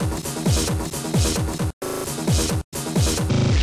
1st bar from the CD skipping glitchcore sequence less synth. Loopable and very fxxckable. percussive with some tonality.
experimental, glitch, hard, loop, skipping-cd, techno, weird